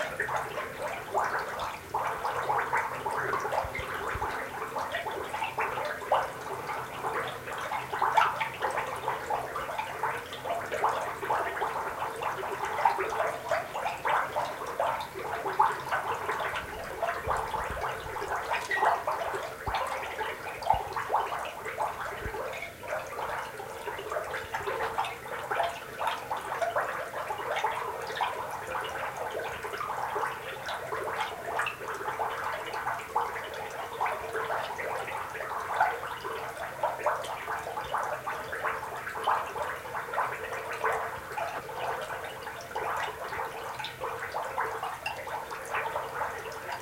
This is a light, close perspective point of view or water running through a storm drain. A little bit more babble. Location Recording with a Edirol R09 and a Sony ECS MS 907 Stereo Microphone.